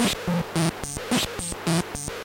Elek Perc Loop 003 Var4
A synth percussion loop straight from the Mute Synth 2.
Slight tweaks to knobs produced a new variant.
REcorded straight into the laptop mic input.
No effects or post-processing. Simply cut and trimmed in Audacity.
analogue, electronic, loop, loopable, Mute-Synth-2, Mute-Synth-II, rhythm, rhythmic, seamless-loop, synth-percussion